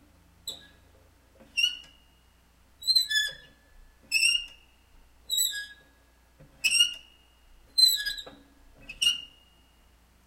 Metal Squeak 2
Metal fireplace door creaking, 3 mics: 3000B, SM57, SM58
metal, squeak